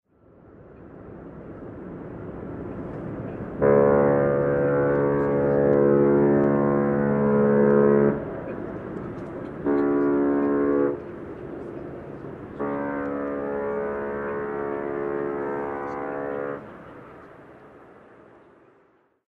queen mary II 04feb2007
As the ship Queen Mary 2 approached the Golden Gate Bridge from the Pacific on her first visit to San Francisco, February 4th, 2007, this recording of her greeting was made from the Marin Headlands.
queen-mary-2, queen-mary-ii, san-francisco